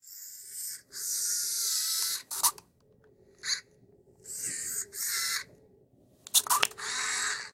Click! I take a picture with a Minolta Vectis-300 APS film camera. Clicking of the shutter and then the film winds. There are several different sounds in this series, some clicks, some zoom noises.